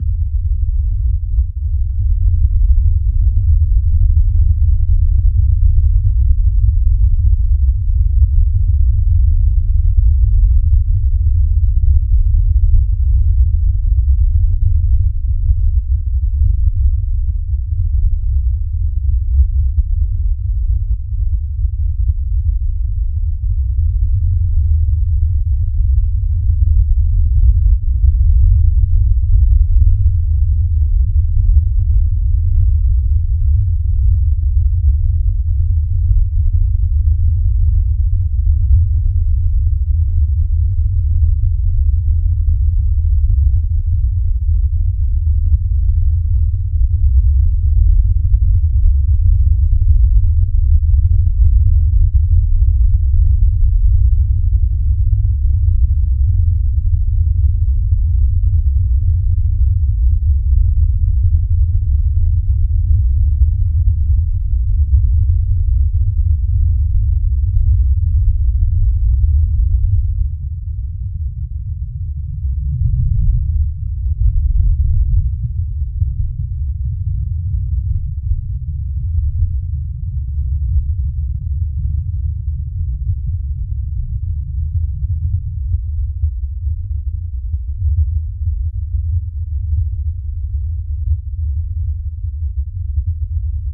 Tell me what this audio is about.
Created by Carmelo Pampillonio for use in the Make Noise Morphagene.
Seismic waves unfurl across the surface of the Earth at massive magnitudes over deep geologic time; their ripples slowly circumnavigating across the breadth of the entire planet multiple times before dimming out. The easiest way to transpose seismic waves into sound and render them audible to human hearing is to play them at a much faster speed via a time-frequency transform. I consider this, along with my work with VLF waves, to be documentary practices, where I leave the sounds completely raw and unedited to preserve the general characteristics of the vibrations as much as possible. The act of rendering these waves sensible requires an adjustment — or attunement — of our intuitions of time and scale to degrees which exceed what is generally considered normal and familiar. Conversions like this help us to slide up and down different spatiotemporal dimensions to consider things from varying viewpoints.